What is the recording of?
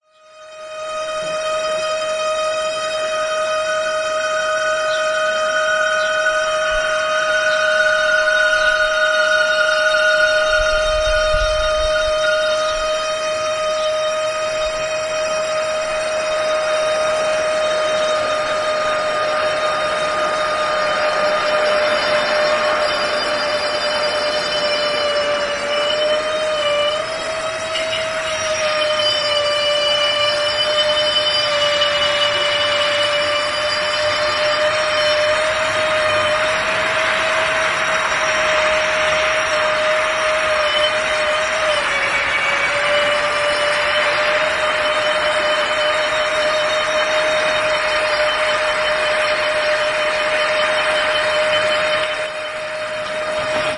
piszczenie balkon190809
19.08.09 about 11.00 p.m Poznan/Polnad. some strange squealing from the one of the stand at a fair on Plac Bernardynski. Recorded from my balcony.